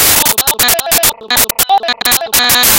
A strange little sound I made. Messed around with my voice recorded with a headset mic and white noise in Audacity.
electric, electronic, glitch, glitchy, malfunction, noise, snow, static, strange, weird